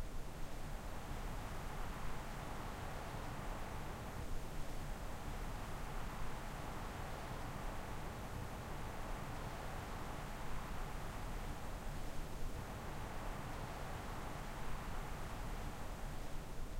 Winter, Windy (Looped)
A windy winter night.
ambiance, ambience, ambient, atmo, atmos, atmosphere, atmospheric, background, background-sound, cold, freeze, frost, general-noise, ice, snow, soundscape, white-noise, wind, winter